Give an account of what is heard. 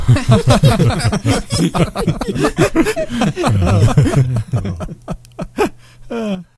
Just a small group, 5 or 6 people, laughing.